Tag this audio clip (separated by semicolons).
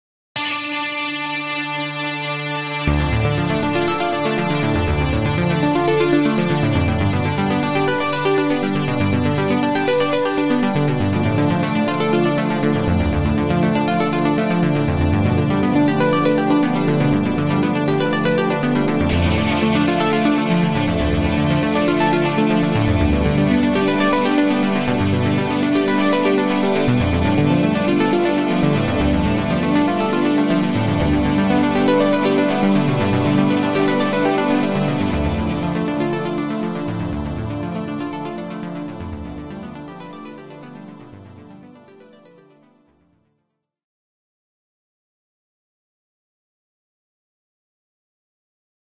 arpeggiator; ascending; bass; build-up; cinema; cinematic; climactic; climax; credits; crescendo; decelerating; descending; end; film; finale; happy; melancholic; movie; outro; repetition; reveal; sad; sequence; slow; sound; strings; synth; tension; trailer; transition